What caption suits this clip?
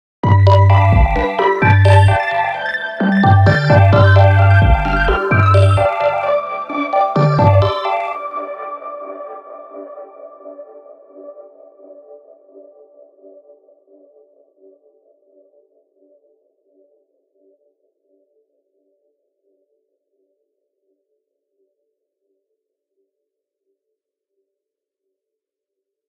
density 5 together
synth loop creation.
8-bit, awesome, cheerful, echo, encouraging, energetic, free, game, gaming, jelly, lift, loop, melody, modern, music, part, promising, quirky, ramp, retro, sample, synth, video